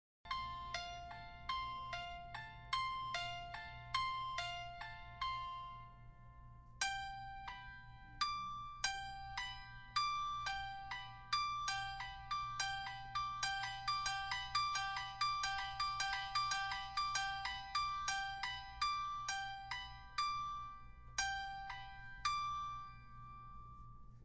42 - Sonido emocionante
Foley sounds, without effects.